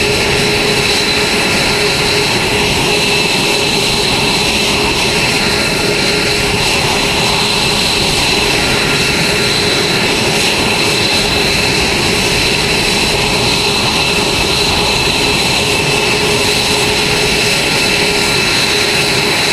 Idle F-22 Jet Plane
A plane before takeoff.
airplane, aviation, blow, blowing, burn, burned, burning, engine, fire, firing, jet, motor, movement, plane, propulsion, standby